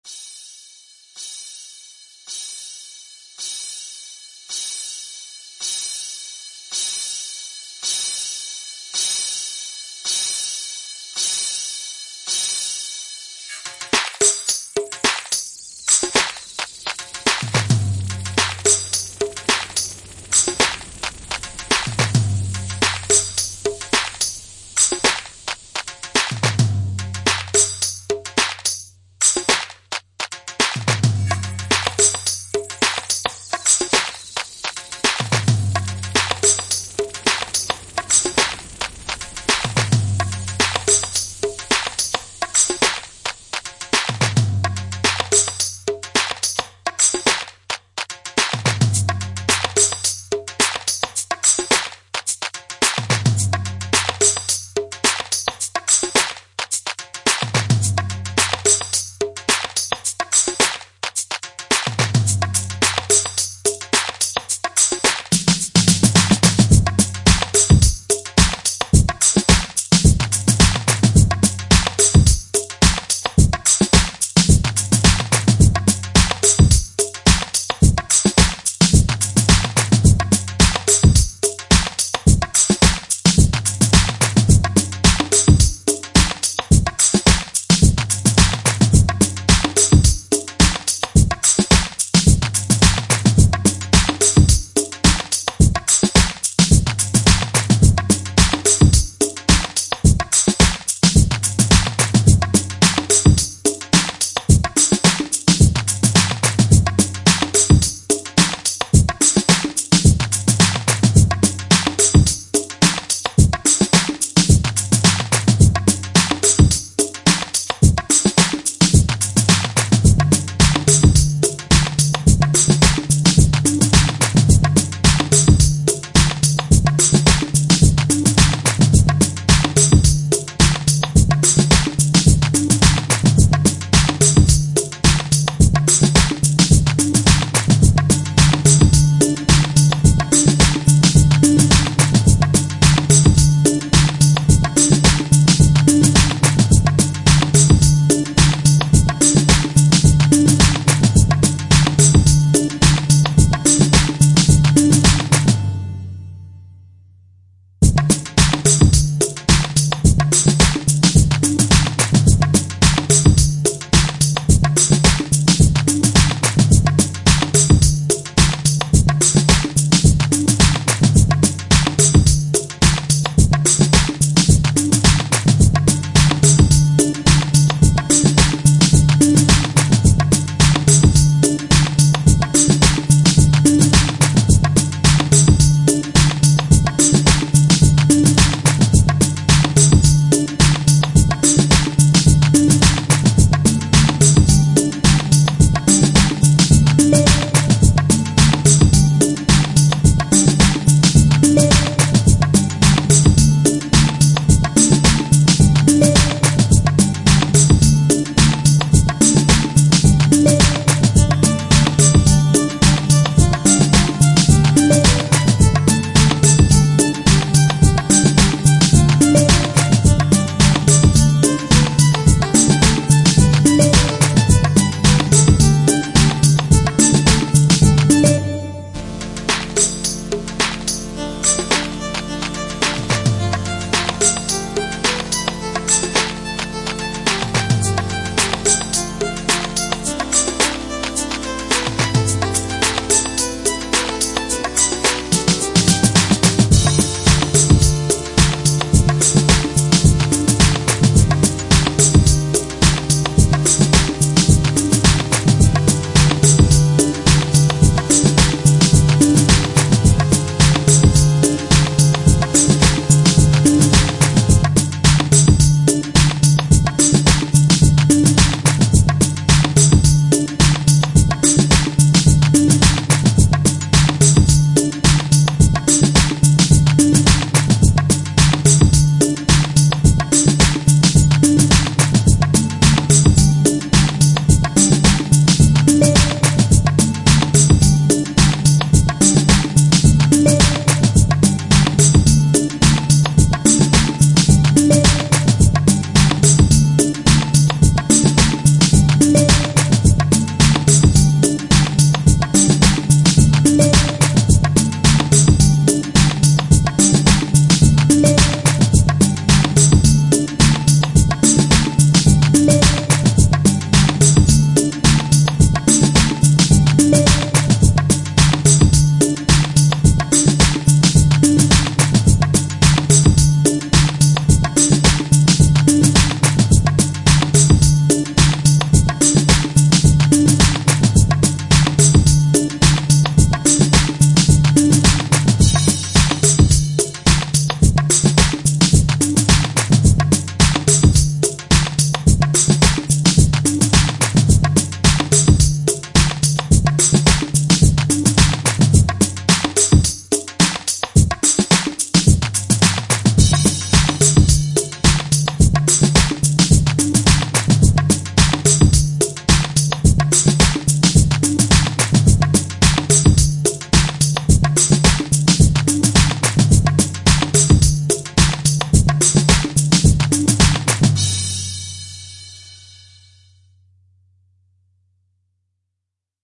Ambient frica melodia
music rythmic with percussion for soundtrack video ambient music sampling
world, drums, percussion, background, rythm, soundtrack